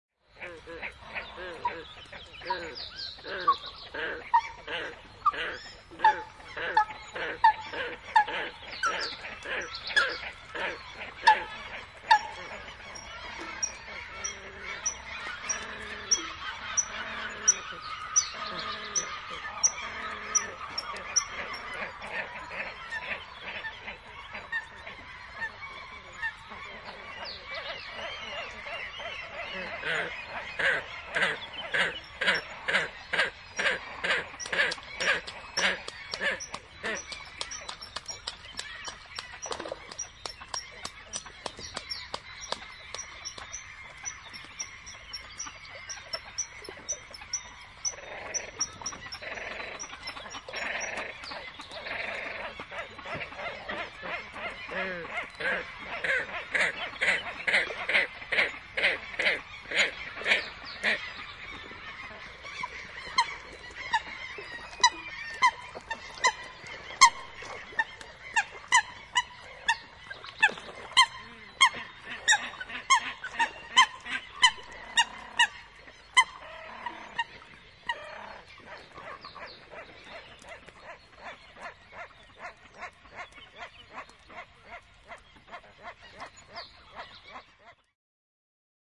Ruovikkoinen järvi, paljon lintuja, kiihkeä keväinen tunnelma. Nokikanat ja silkkiuikut ääntelevät vedessä, siipien läiskytysta. Taustalla myös muita lintuja.
Paikka/Place: Suomi / Finland / Lohjansaari, Maila
Aika/Date: 21.04.2002